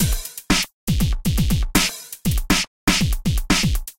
120hydro1fv
simple electro loop done in hydrogen
120, 120bpm, electro, loop